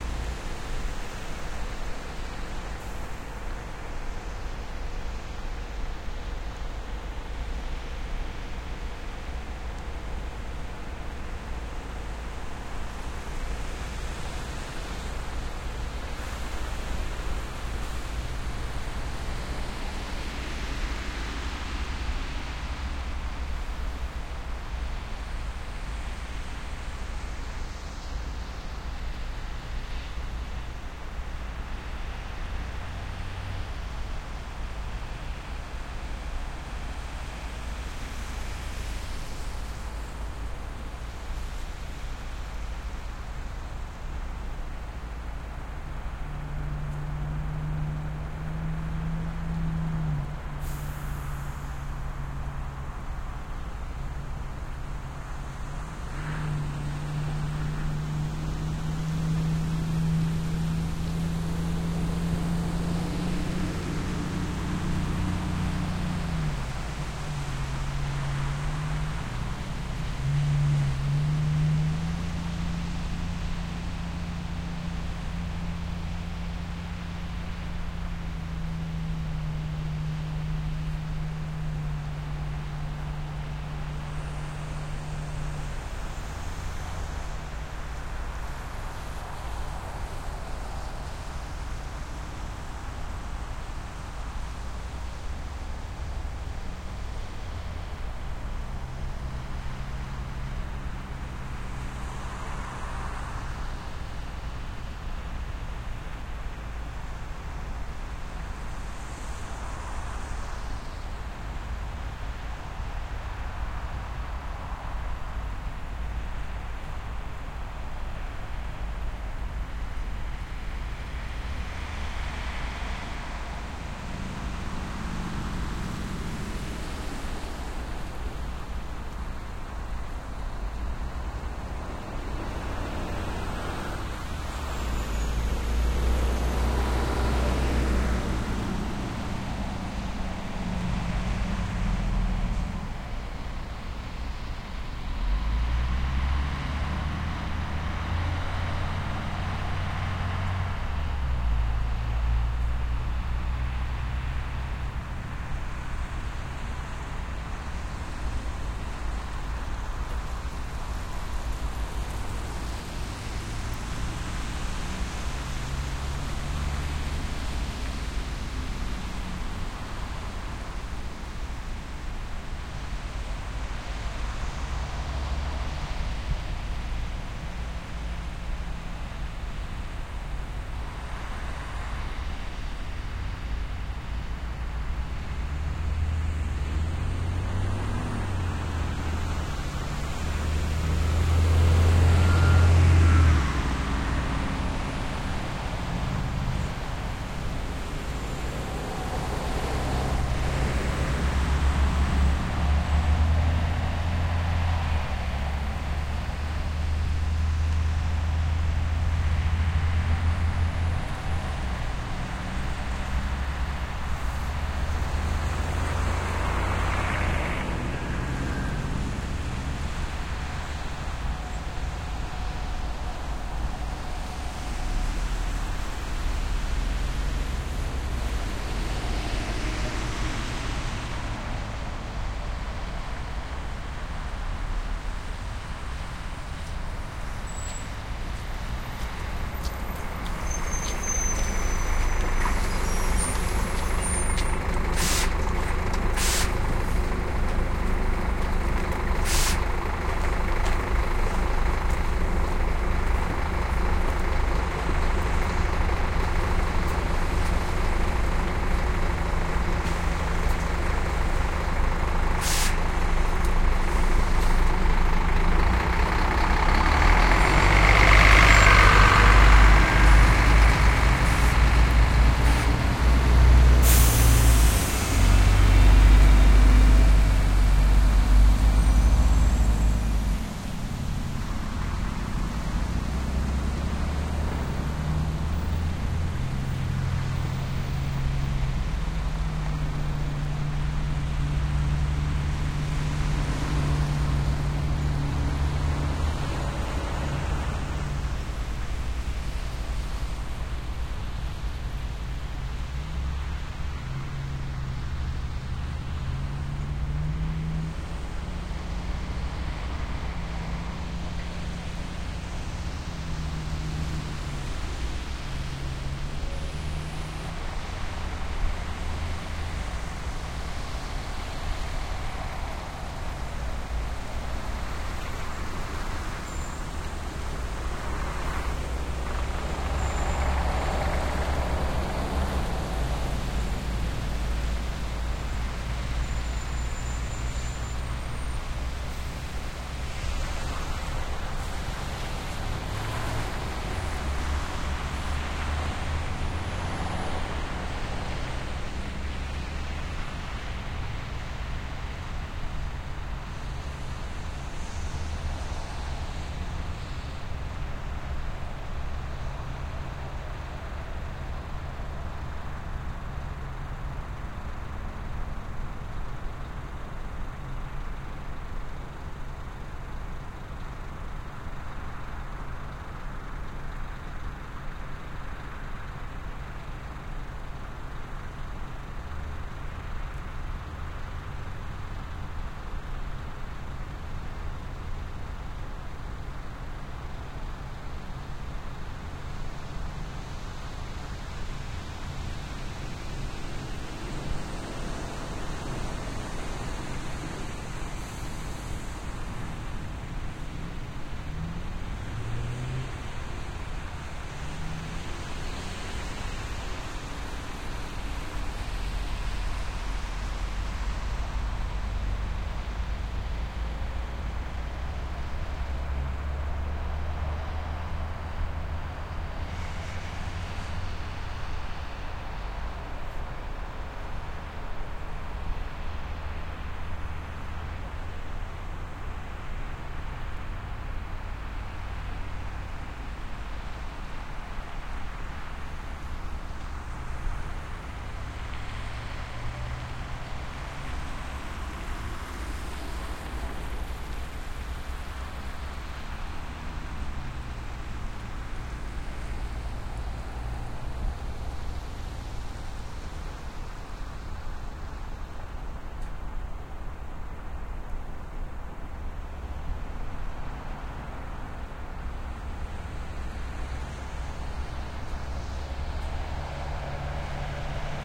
Ågotnes Terminal B format
Ambisonics (surround) field recording done at Ågotnes bus terminal at the Sotra Island west of Bergen, Norway. Busses arriving and departing, more distant traffic. This file has been uploaded in three versions: 4-channel ambisonic B-format, binaural decoding using KEMAR HRTF, and a regular stereo decoding.
Equipment: SoundField SPS200, Tascam DR640. Decoding is done using the Harpex plugin.